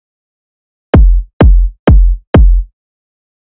Kick Loop 1
A medium length dance kick drum loop with a quick attack and little noise or distortion.
Dark, Drum, Bass, Tech-House, Thud, Tech, Thick, Drums, Dance, Shrap, Kick, Big-Room, Quick, Big, Drum-Loop, Heavy, 4x4, Loop, House, Bass-Heavy, Bass-Drum, EDM, Kick-Drum, Techno, Deep